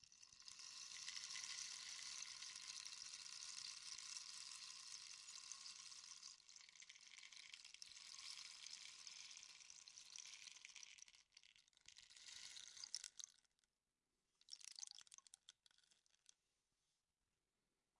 Rainstick recorded by SM57
wood, rainstick, instrument